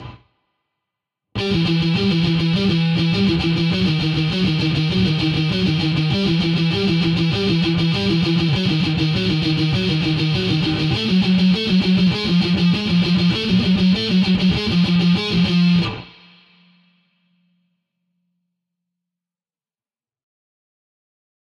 Composicion guitarra electrica para crear suspenso